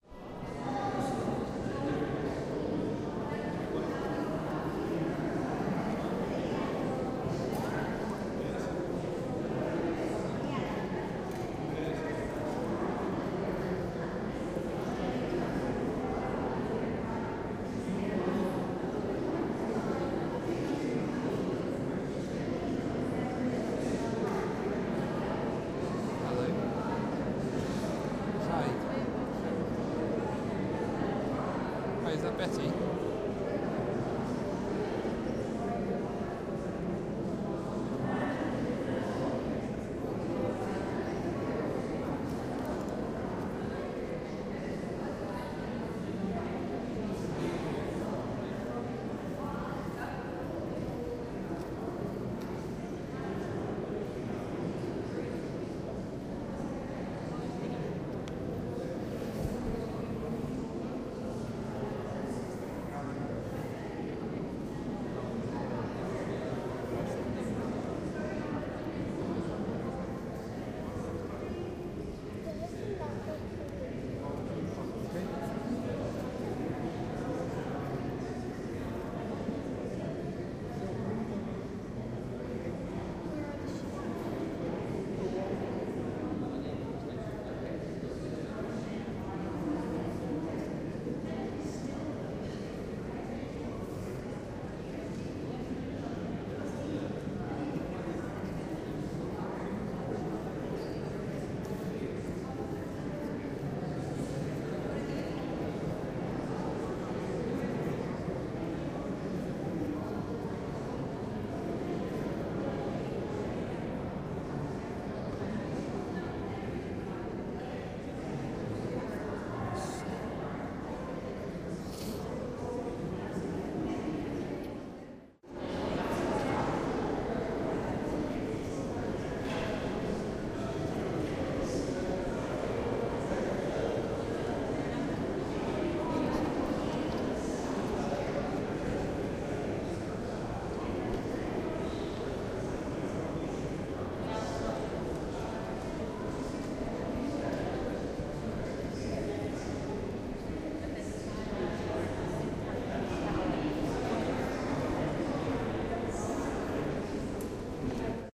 Crowd Walla, Rijksmusem, Amsterdam, NL
Couple of separate recordings in one file, museum goers admiring Rembrandt and the like...
atrium, chatter, crowd, hall, indistinct, murmur, murmuring, museum, people, reverb, rhubarb, walla